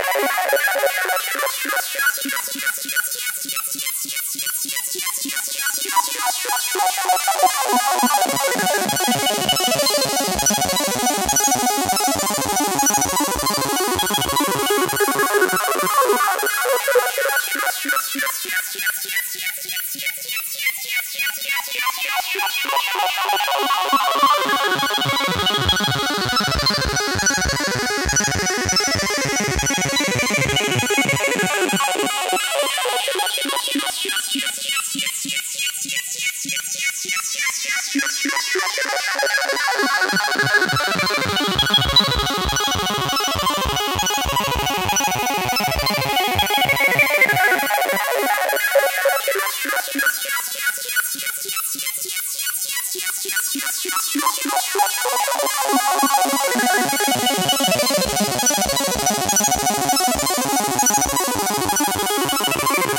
Chip Dipper G Hiroshi 100
Very stylish and retro sounding sound synth made in ableton live
8-bit, arcade, chip, chippy, chiptune, computer, decimated, lo-fi, noise, retro, sweep, vgm, video-game